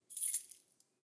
Keys Handling 2
The enjoyable and satisfying clinking symphony of handling keys on a ring